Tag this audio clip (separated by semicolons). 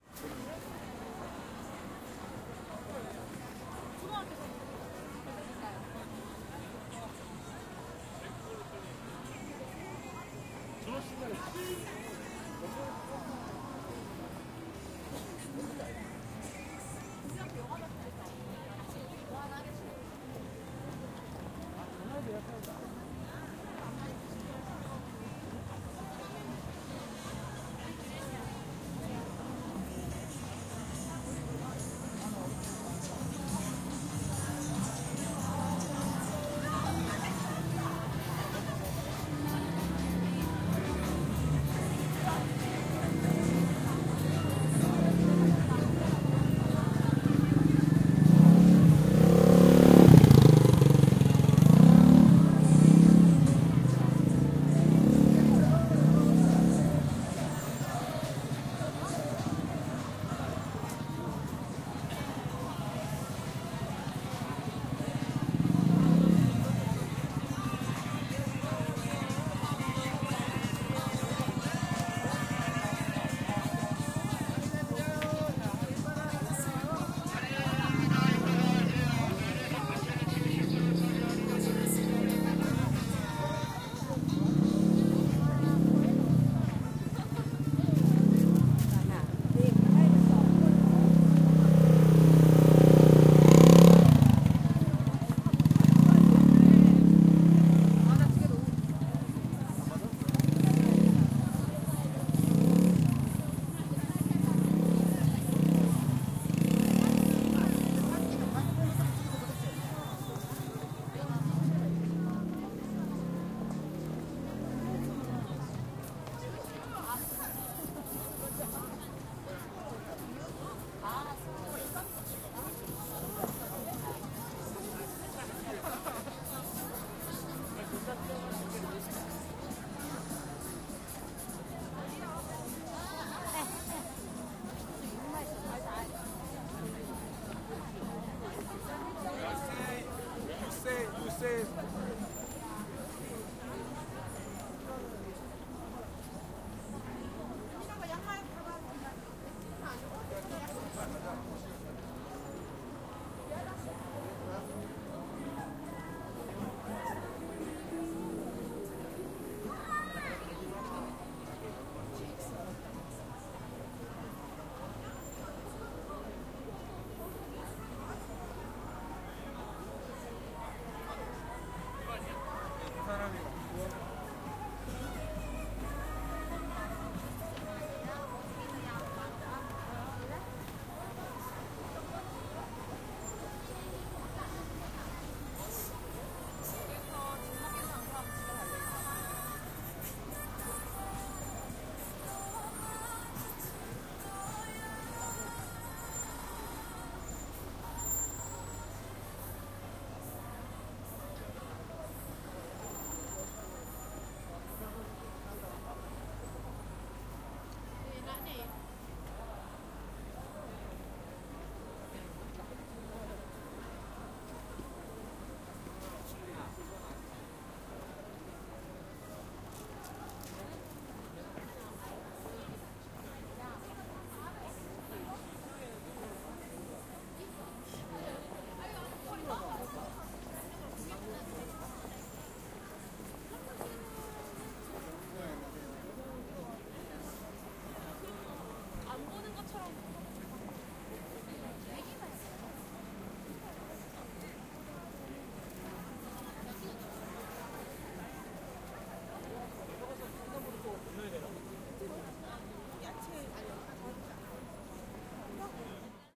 music; horn